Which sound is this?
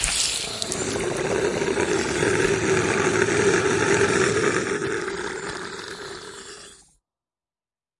1 of 4 sounds created for sound design challenge on 11/5
Recorded with ZOOM H4n
Sound made using recordings of a hydraulic door mechanism, wet paper towel, and human guttural sound.